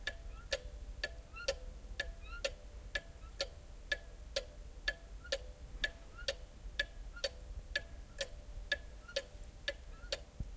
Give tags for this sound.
clock cuckoo

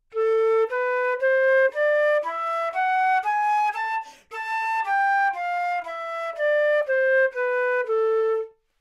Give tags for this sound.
flute minor scale neumann-U87 Anatural good-sounds

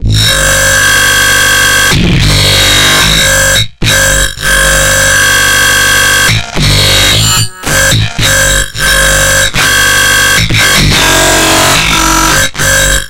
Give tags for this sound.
hard-track psy-record sample-dark-bass-heavy

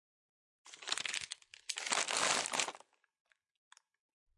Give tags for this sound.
CZ Czech Pansk Panska Shoping

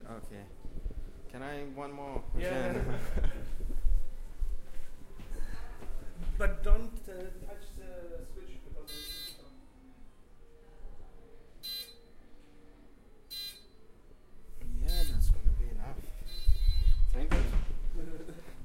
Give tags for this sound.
game games play

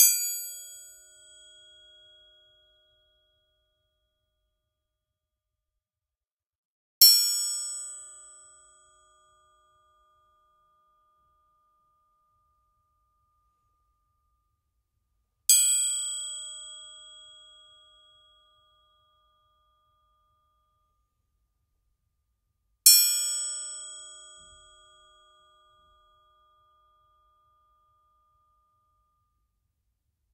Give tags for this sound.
musical ringing triangle orchestral metal percussion bell

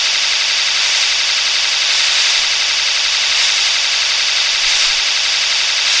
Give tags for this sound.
tv logic-pro-9 remediation new-media iphone-speakers television mono-chrome-mic white-noise static

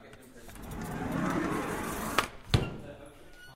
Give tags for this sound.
curtain slide welding click bracket industrial

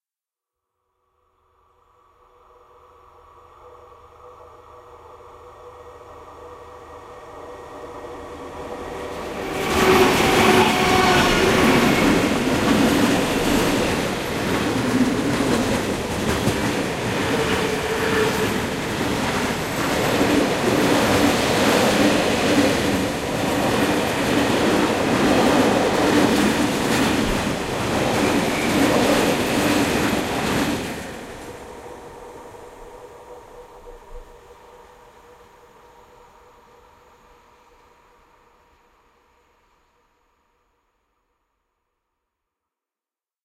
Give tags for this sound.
around ride railroad

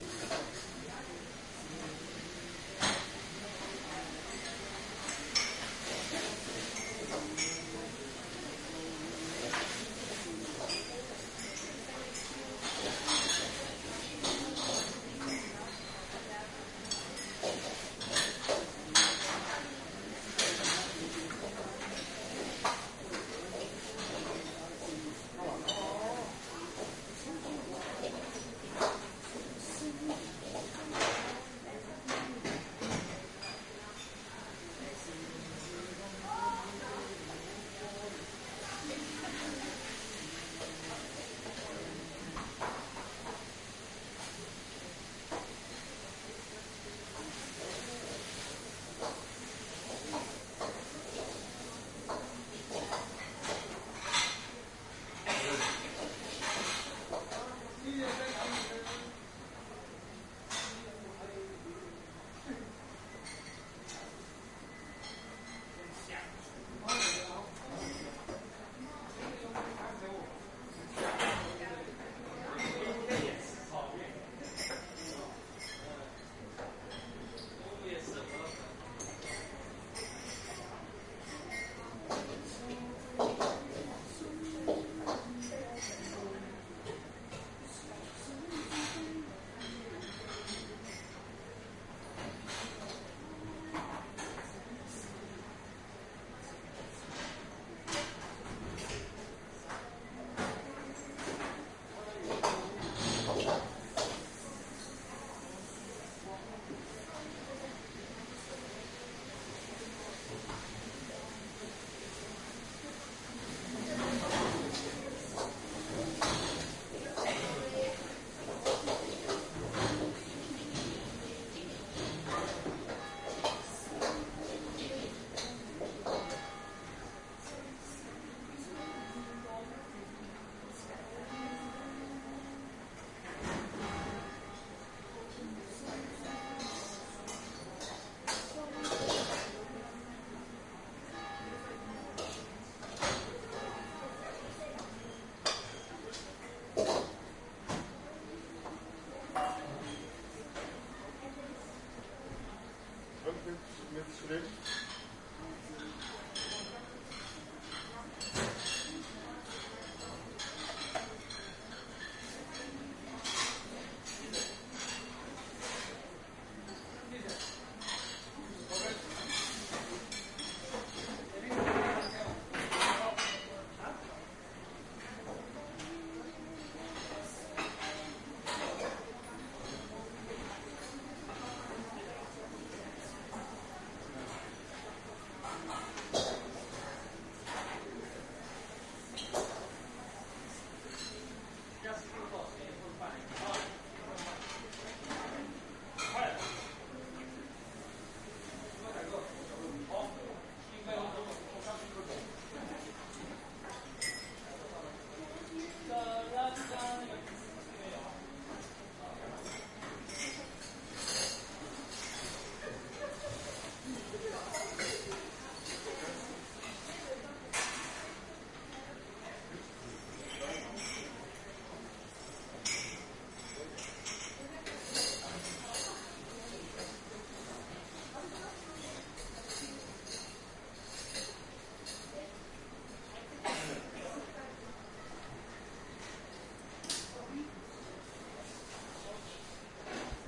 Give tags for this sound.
noise bern background switzerland restaurant cleaning kitchen singing bells dishes church

